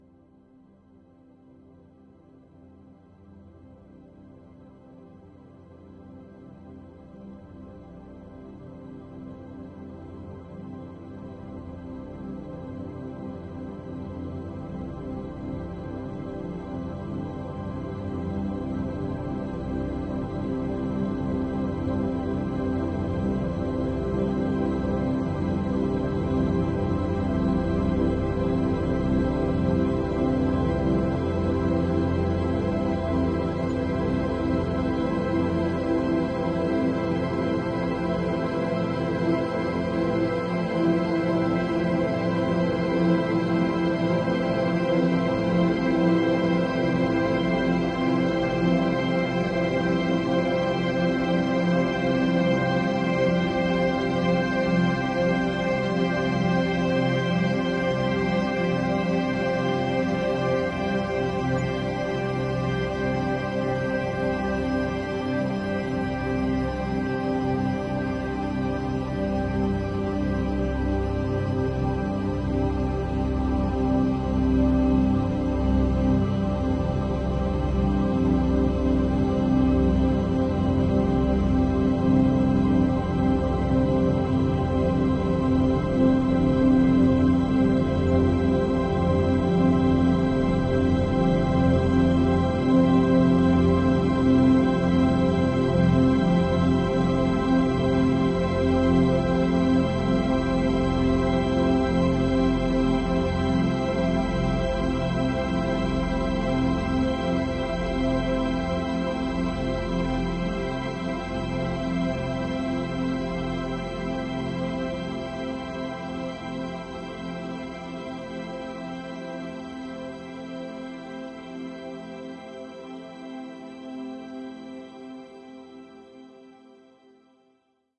Made from synthetic and natural sounds. Vocal mixed with orchestral sounds.